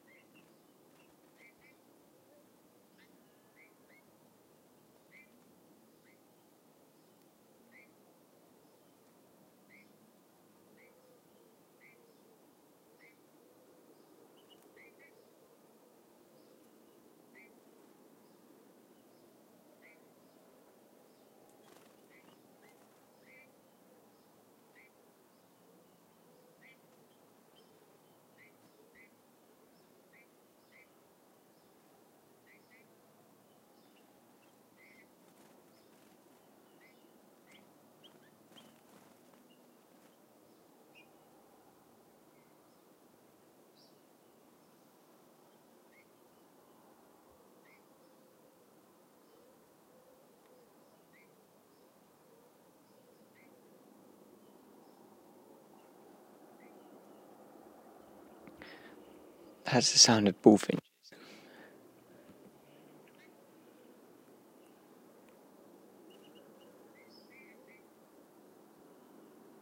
Bullfinch Calls in UK
Bullfinch flock calling at edge of coniferous woodland in Somerset, UK. Recorded on a Sennheiser Microphone.
chirp, wildtrack, song, bullfinch, coniferous, nature, wildlife, calls, uk, europe, bird, atmos, finch, woodland, icuttv, england